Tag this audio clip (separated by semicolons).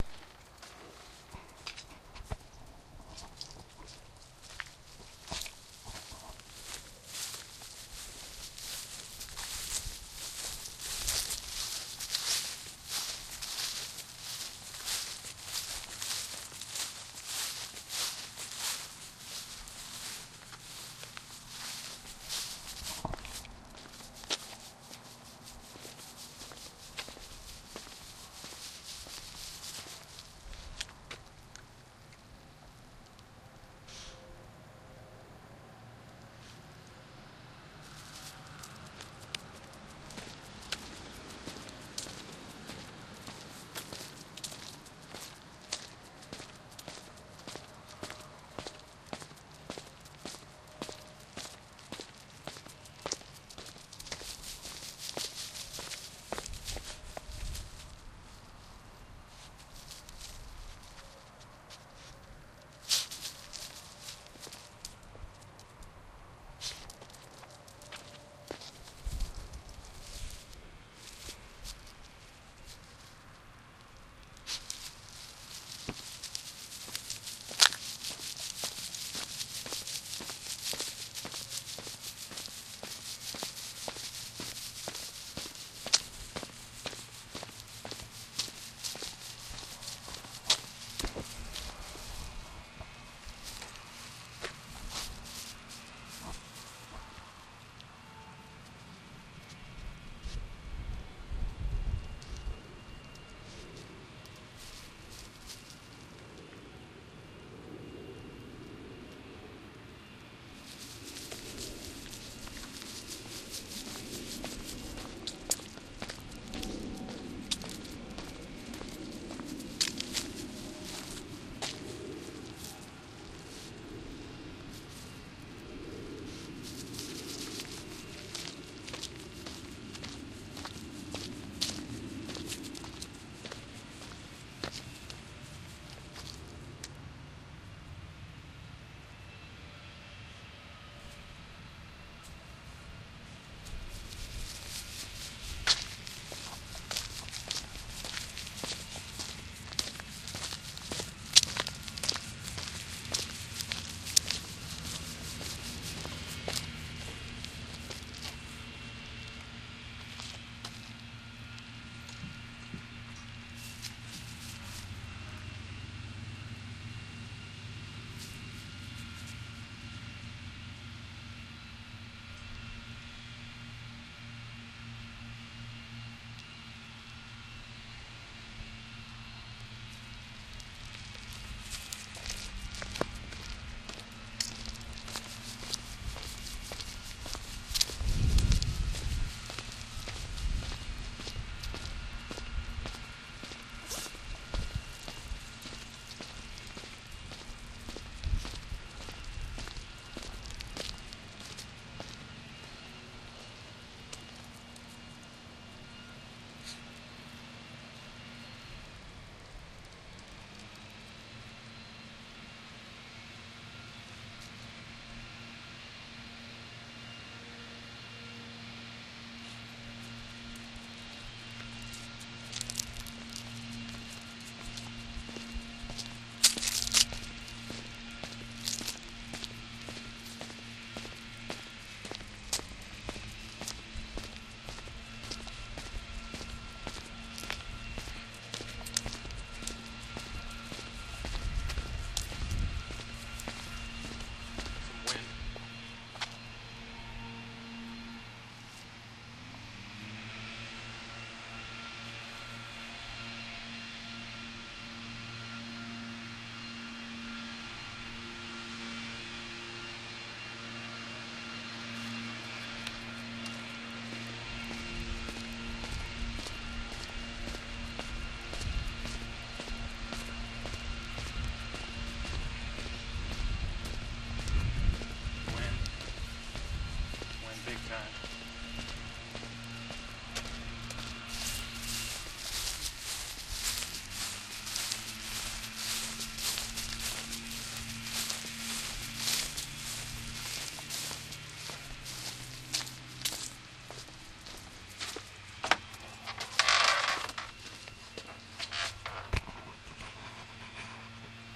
field-recording; walking; dog